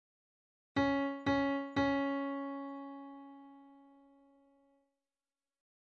Di C Sharp Piano Sample
c, di, piano, sample, sharp